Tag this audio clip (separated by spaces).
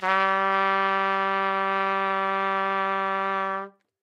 sample trumpet